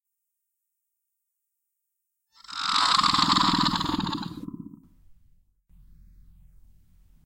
A scary large monster screech/growl for ambience.